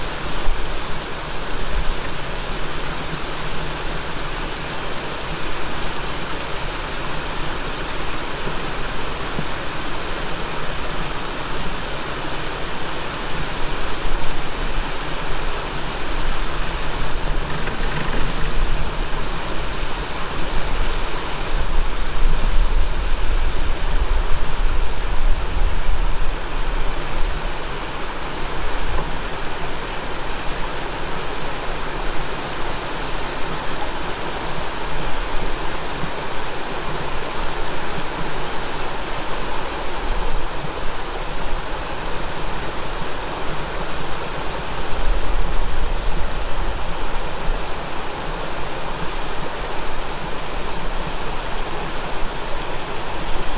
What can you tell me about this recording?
Recorded with Sony PCM-D50 in June 2014 on the cableway in the Carpathians, Ukraine.
ambiance, ambience, ambient, birds, cableway, Carpathians, field-recording, forest, Karpaty, mountain, nature, PCM-D50, ropeway, Ski-lift, sony, stream, summer, Ukraine, water